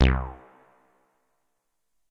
MOOG BASS SPACE ECHO C
moog minitaur bass roland space echo
bass, echo, minitaur, moog, roland, space